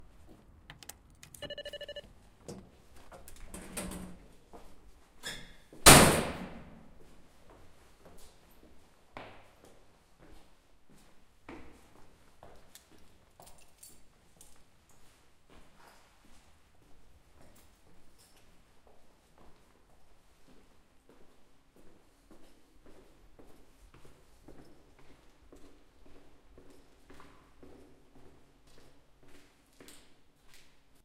porch door lock
Nine-story building. Open door-lock on the porch and go. Hear footsteps.
Recorded at 2012-10-14.
door-lock, city, porch, footstep, lock, steps, town, step